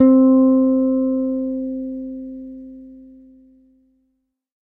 Third octave note.